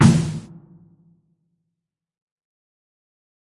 series of percussive sounds mostly kicks and snare synthesized with zynaddsubfx / zynfusion open source synth some sfx and perc too these came from trying various things with the different synths engines